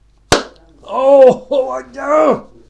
I don't know what happened when this guy stood up by night and hit something on his way to the frig. He was heard miles around.
accident, frustration, outburst, snap